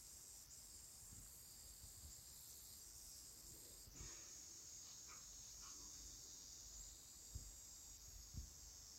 Zischender Teebeutel Wasser
Sound of a tea bag whispering?